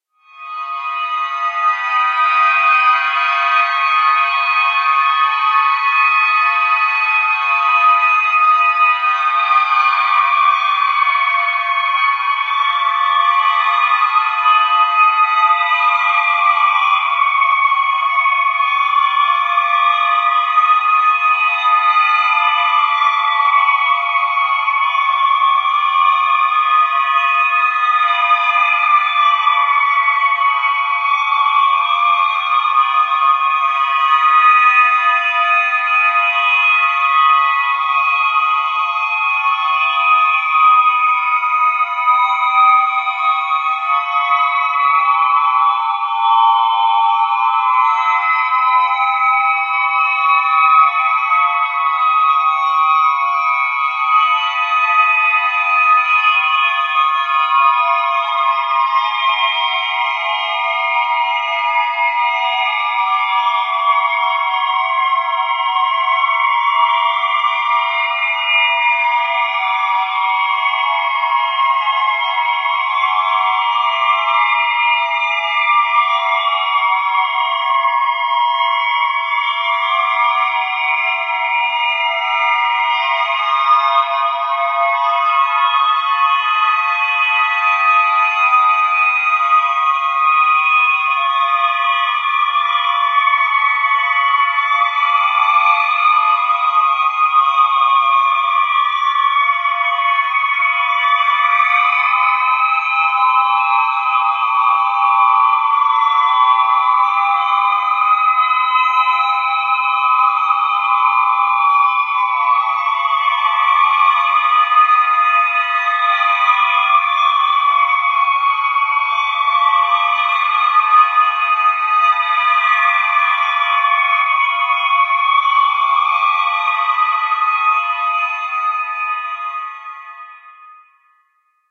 PlanetaryAndXporterWithNoiseExtended STTOS recreated
Recreation of a commonly-used Star Trek (the original series) sound, the one that could be part of a transporter sequence or the background ambiance on a planet surface. This one is treated for planetary ambiance, complete with pitch shifts, additional shimmery modulations, and reverberation. This sound is not lifted or modified from anything, but created mathematically from scratch in Analog Box and Cool Edit Pro. A shorter loop, drier, and with much less modulation and noise, is available from the SciFi pack.
planet, noise, sttos, sci-fi, ambient, background, star-trek